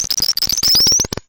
Mute Synth Clicking 003
Some digital clicking sounds.
Please see other samples in the pack for more about the Mute Synth.